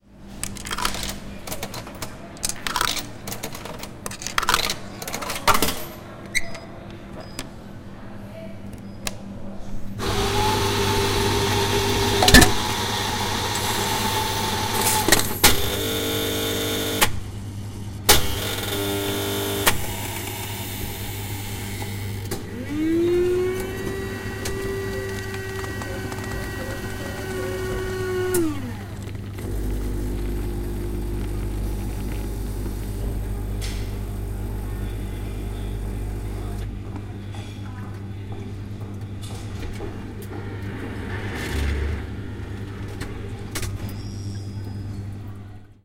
Vending Coffe Machine
Buying a coffee in a vending machine.
UPF-CS14, campus-upf, coins, field-recording